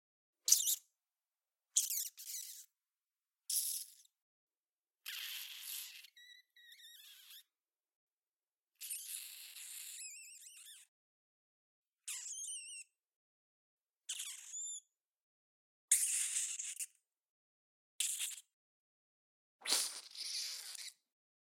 Spider Killed Foley 01
Hand picked my favorite "killed" sounds from my Spider_Foley 01, 02 & 03 and Squish_Foley_01 files.
CAD E100S > Marantz PMD661
animal, rpg, spider, rat, bat, game-foley, spider-killed, screech